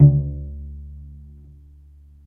A pizzicato multisample note from my cello. The sample set ranges from C2 to C5, more or less the whole range of a normal cello, following the notes of a C scale. The filename will tell you which note is which. The cello was recorded with the Zoom H4 on-board mics.
acoustic, cello, pluck, strings